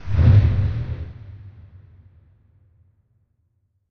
Basic Fire whoosh
It's a bamboo stick swinging, which I layered and toyed with to get the results here.
For those curious, here are the steps.
* I took the file into Reaper, and slowed the file's playback rate to 31% of the original.
* I then duplicated the file three times, for a total of 4 tracks.
* TRACK 1 (lowish gas-burner sound):
- Reverb (drum-room impulse response, a medium-sounding reverb with a slight deadness to it. In free impulse loader Reverberate LE, stretched impulse to 150% and set attack time to 0.218s).
- EQ (-8db bandpass near 200hz, rising back to 0 by the 1K mark. Low-pass applied at 5.8khz).
* TRACK 2 (lowest of sounds, like a bassy gas-burner):
- Chorus effect. That's it. (Specifically Acon Digital Multiply, a free plugin, set to the "romantic" preset).
* TRACK 3 (not as low as track 2, but closer to track 2 than 1)
- Reverb (a large hall impulse response. No adjustments, ie. no increased attack or stretching like for Track 1.)
basic, burning, fire, flame, flames, flamethrower, magic, whoose